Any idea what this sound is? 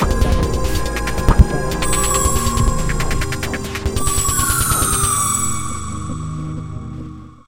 Some quick orchestra peices I did I broke it down peice
by piece just add a romantic pad and there you go, or build them and
then make the rest of the symphony with some voices and some beatz..... I miss heroin....... Bad for you....... Hope you like them........ They are Russian.
love, space, ambient, melody, happiness